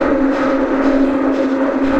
convoluted bare bone loop 60 bpm 126
This is loop 60 in a series of 135 loops that belong together. They all have a deep dubspace feel in 1 bar 4/4 at 60 bpm and belong to the "Convoloops pack 02 - bare bone dubspace 60 bpm" sample pack. They all have the same name: "convoluted bare bone loop 60 bpm"
with three numbers as suffix. The first of the three numbers indicates
a group of samples with a similar sound and feel. The most rhythmic
ones are these with 1 till 4 as last number in the suffix and these
with 5 till 8 are more effects. Finally number 9 as the last number in
the suffix is the start of the delay and/or reverb
tail of the previous loop. The second number separates variations in
pitch of the initial loop before any processing is applied. Of these
variations number 5 is more granular & experimental. All loops were
created using the microtonik VSTi.
I took the bare bones preset and convoluted it with some variations of
itself. After this process I added some more convolution with another
60-bpm, deep, dub, dubspace, loop, space